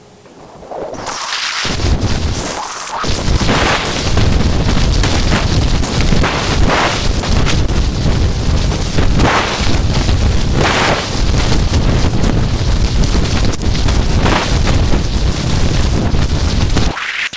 sample exwe 0314 cv fm lstm 256 3L 03 lm lstm epoch13.31 1.6639 tr
generated by char-rnn (original karpathy), random samples during all training phases for datasets drinksonus, exwe, arglaaa
recurrent, generative, neural, char-rnn, network